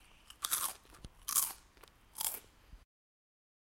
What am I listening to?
chip bite 1
biting a chip
crunching, chew